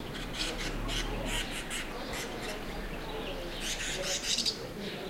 House Martin 4
The harsh sounds of house martins nesting under eaves in the delightful French village of Collobrieres.
house-martin, martin, field-recording, bird